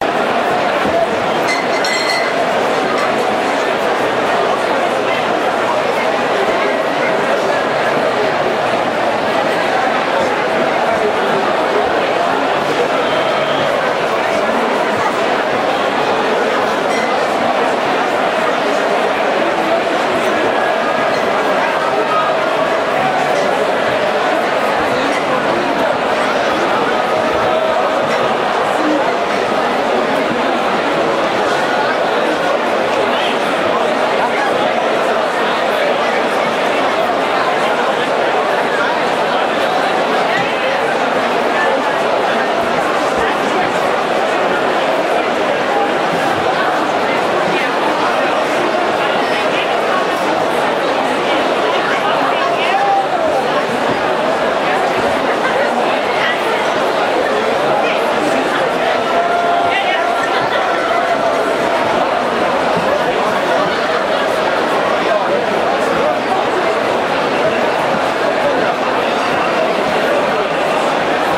Beer tent in munich during the oktoberfest in the year 2000.
Recorded with a Sony DCR-PC100 Video-Camcorder.